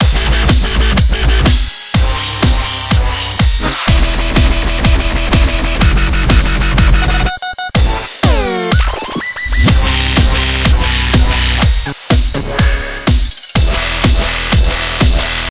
Thrilled cream
loop, dupstep